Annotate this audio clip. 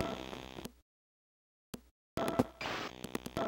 Arranged kat's samples to resemble some of Jovica's "massive and aerobic" loops